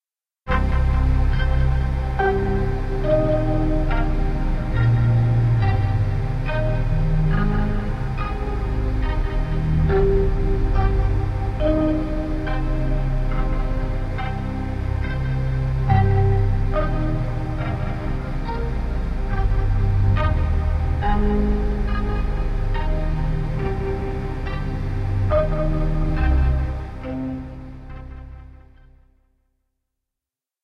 sci-fi8
ambience, drone, electronic, future, fx, hover, impulsion, machine, sci-fi, sound-design, spaceship